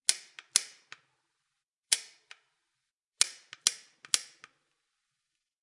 Working with pliers.
Recorded with Oktava-102 microphone and Behringer UB1202 mixer desk.
click, craft, foley, metal, pliers, work